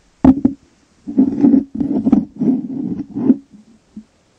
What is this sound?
Stone Metal Dragging
Rock and metal scraping and dragging along.
metal, rocks